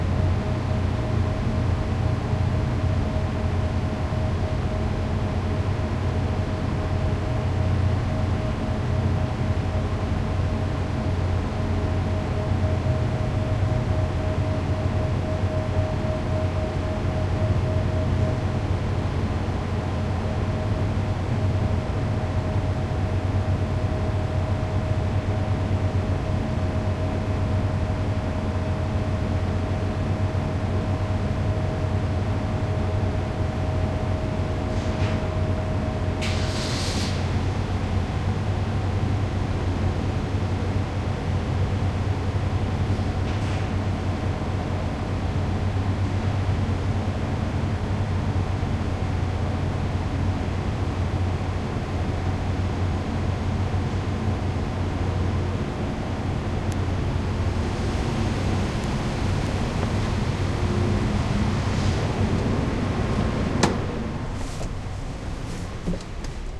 Recordings made while waiting for the Washington State Ferry and at various locations on board.